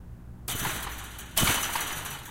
Sound of a chain link fence being hit. Microphone used was a zoom H4n portable recorder in stereo.
recording, atmosphere, field, city